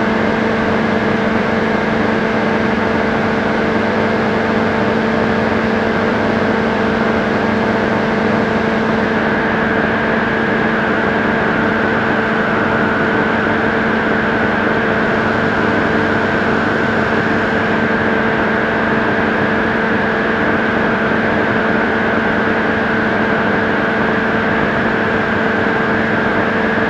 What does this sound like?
radio fuzz1
military, transmitter, government, telecommunication, distortion, radio, noise, receiver, morse, communication